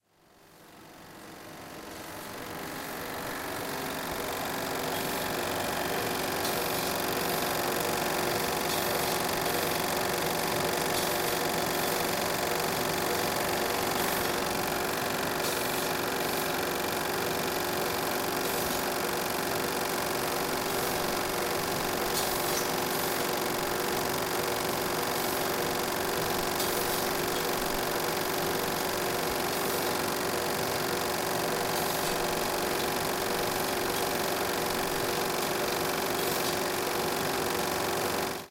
15 - Projector working
16mm projector working - Brand: Eiki
Proyector de 16mm en funcionamiento - Marca: Eiki